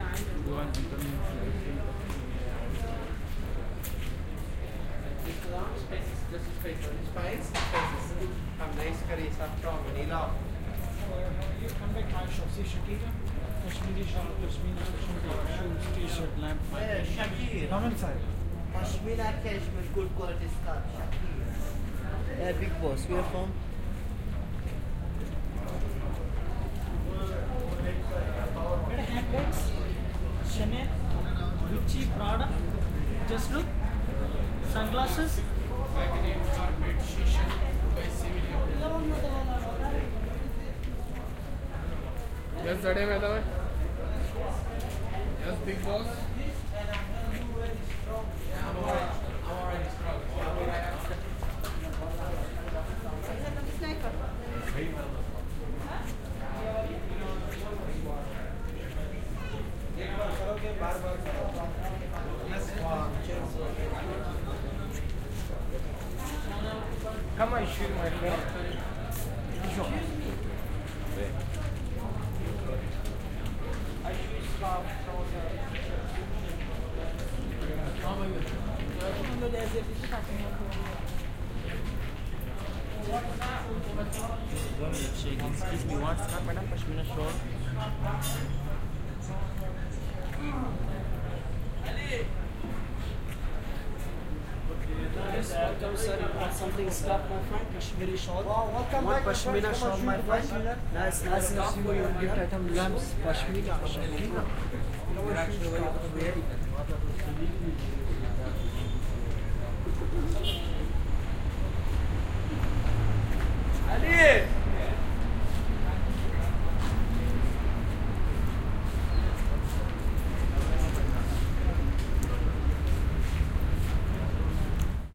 dubai goldmarket
walking a touristmarket in Dubai... I suddenly have a lot of friends.
arabian, buying, chatting, field-recording, market, merchandise, people, selling, talking